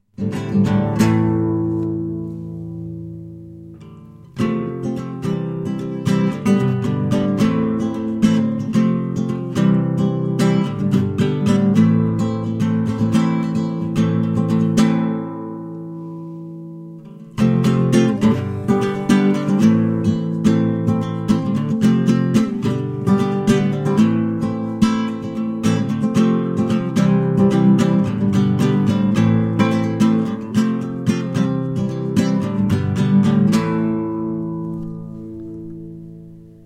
Acoustic Fun
This sound contains 2 chord patterns played on a classical nylon guitar.
clean, open-chords